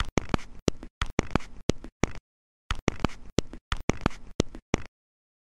Click Beat #1
I arranged this beat out of the clicks and pops from pressing buttons on my mp3 player while it was recording.
beat, glitch, recorder, button, mp3-player, music, pop, click